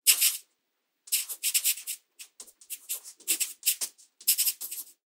Pencil Writing 1 3
Table, Pen